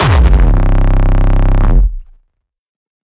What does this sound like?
Hardstyke Kick 18
bassdrum, distorted-kick, distrotion, Hardcore, Hardcore-Kick, Hardstyle, Hardstyle-Kick, Kick, layered-kick, Rawstyle, Rawstyle-Kick